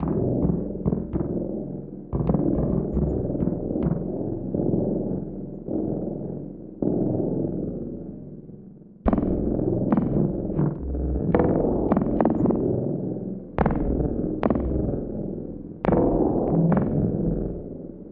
3rdBD L∞p-106BPM-MrJkicKZ
Third Bass Drum L∞p 106BPM - Russian Urban Research
You incorporate this sample into your project ... Awesome!
If you use the loop you can change it too, or not, but mostly I'm curious and would like to hear how you used this loop.
So send me the link and I'll share it again!
Artistically. #MrJimX 🃏
- Like Being whipping up a crispy sound sample pack, coated with the delicious hot sauce and emotional rhythmic Paris inspiration!
Let me serve you this appetizer!
Here you have a taste of it!
- "1 Drum Kick L∞p-104BPM- MrJimX Series"
- "Second Bass Drum L∞p 100BPM - URBAN FOREST"
- "Third Bass Drum L∞p 100BPM - $CI FI LOVE"
106BPM, 4-4, Bassdrum, Kick, MrJimX, MrJkicKZ, MrJworks, Rhythm, works-in-most-major-daws